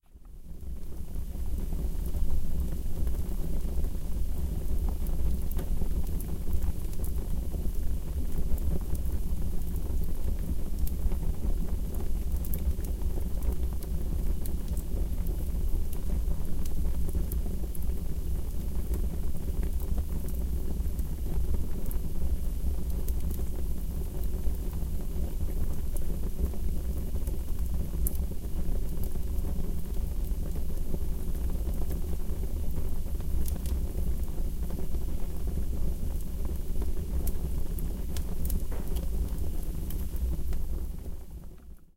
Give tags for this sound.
Fire; Fireplace; Home

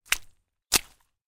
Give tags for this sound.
water; mud; walk; stomp; ground; splatter; step

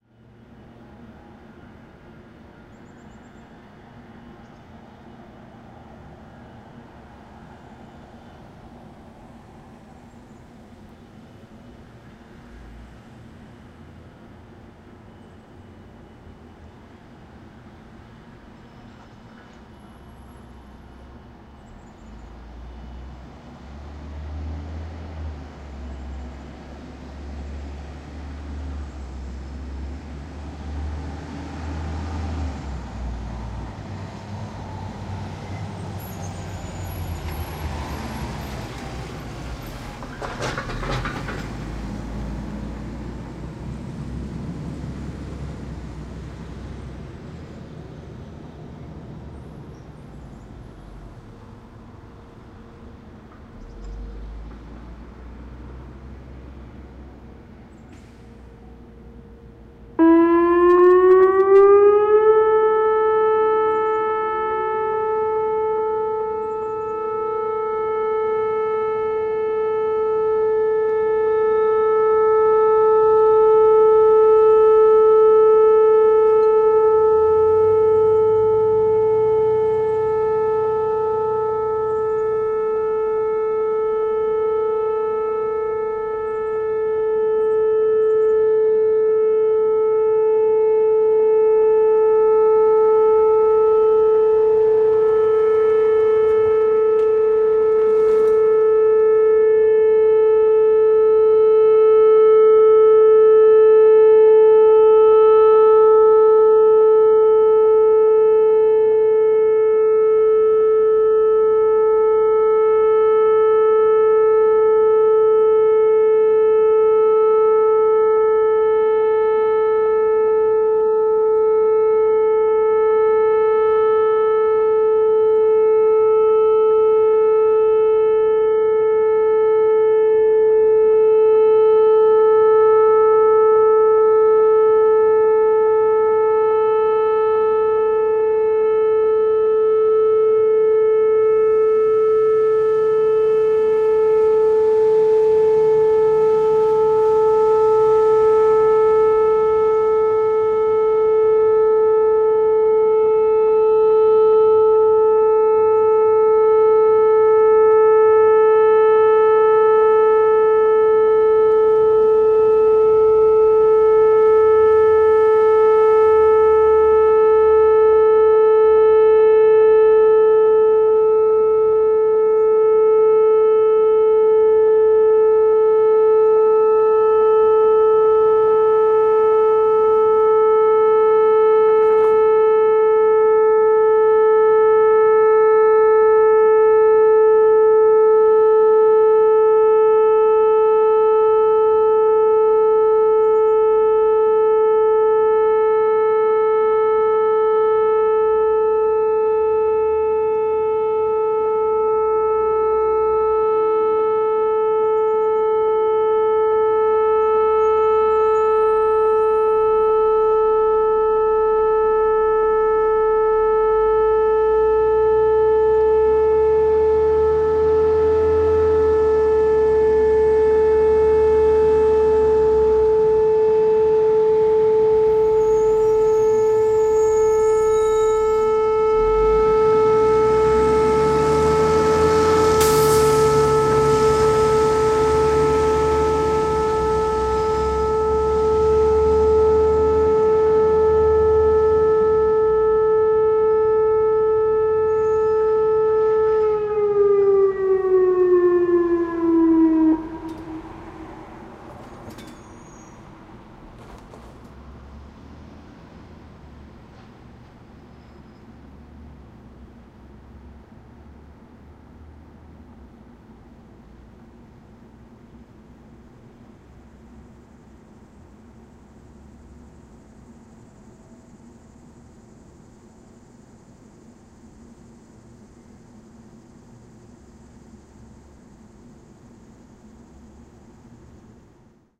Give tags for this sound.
air; alert; civil; defense; raid; siren; storm; tornado; warning

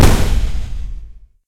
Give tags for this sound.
barrel; big; cannon; explosion; fight; fire; gun; guns; military

